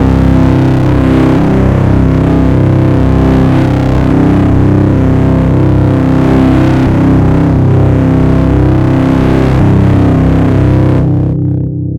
14 ca pad b51
loud horror neurofunk growl
ambience, atmos, atmosphere, atmospheric, background-sound, horror, intro, music, score, soundscape, suspense, white-noise